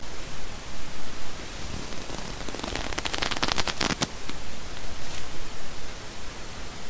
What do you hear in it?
fist clenching (3)
Just made some anime style fist clenching sounds cause I wasn't able to find it somewhere.
fist, clenching, fight, clench, anime, anger, clenched